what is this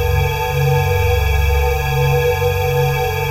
Bight, Breathy Digital Organ made with Reason Subtractor Synths and Logic Drawbar Organ. 29 samples, in minor 3rds, looped in Redmatica Keymap's Penrose loop algorithm.
Digital, Organ